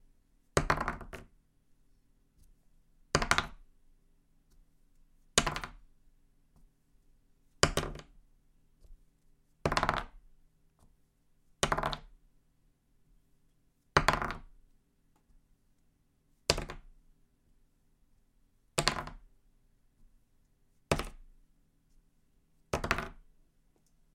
Dice Rolls 15cm
Plastic dice (1) rolling on a piece of paper on a wooden table (to simulate a carton board). Small, slightly treated room with reflective walls.
dice, rolls, jeux, boardgame, table, playing, soci, s, paper, de, wooden, game, d, t, rolling, roll